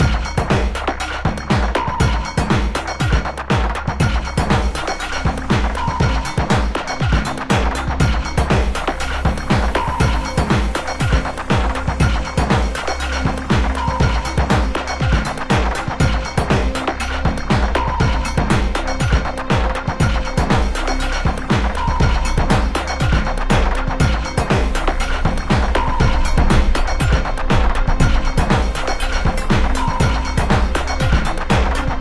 beat tricombo 5
Heavy contrast with the ambient sampler and the hard beat. Logic
120-bpm, ambient, beat, hard